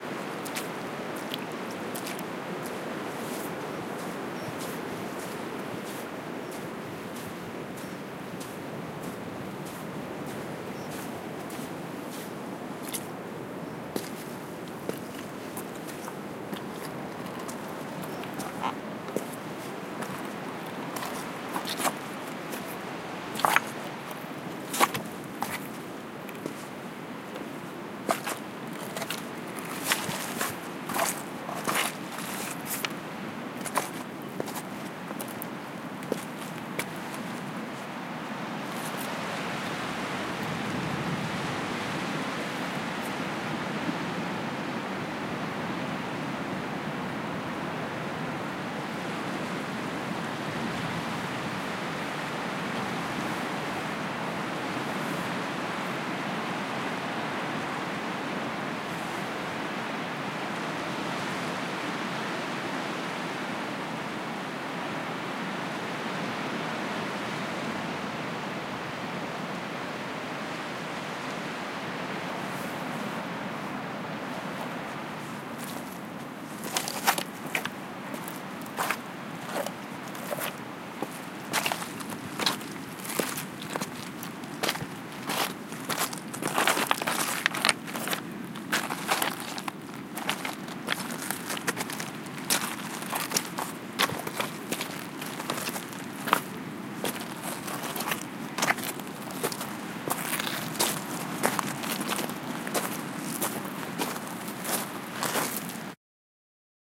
Walking On The Beach 03
Pebbles, Atmosphere, Wales, Water, Crunch, Ocean, Footsteps, Outdoors, Beach, Field-Recording, Ambience, Rocks, Sea, Waves